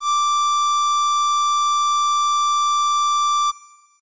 FM Strings D6
An analog-esque strings ensemble sound. This is the note D of octave 6. (Created with AudioSauna, as always.)
pad, strings, synth